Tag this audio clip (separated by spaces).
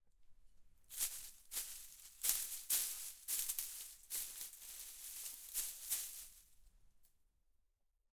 garden bush panska